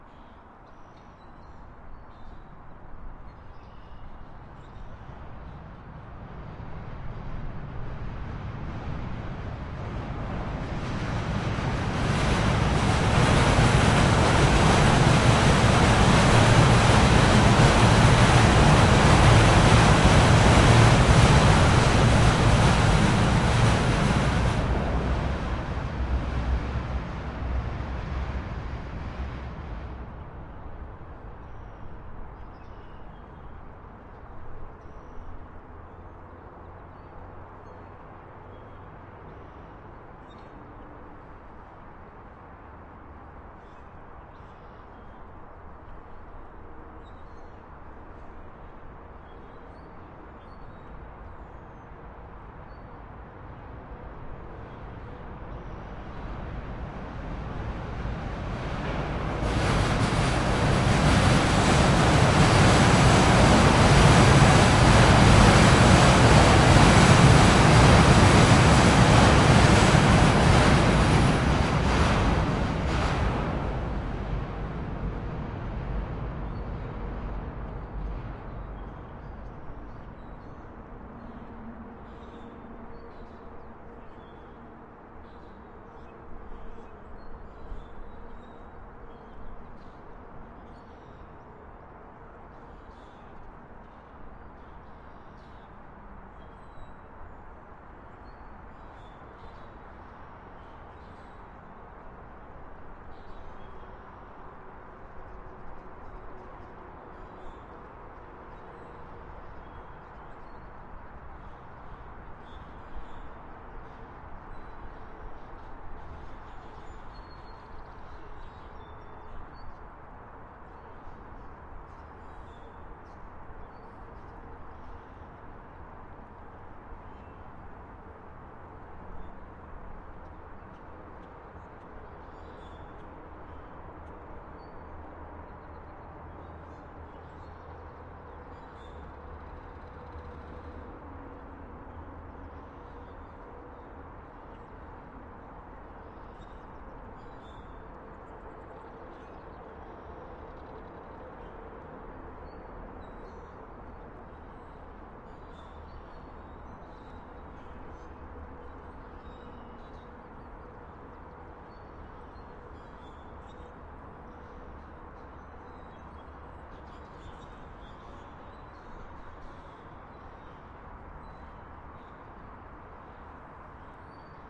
DVP Subway Pass and Distant Traffic
bridge, under, traffic, bys, toronto, pass, valley, subway, don, parkway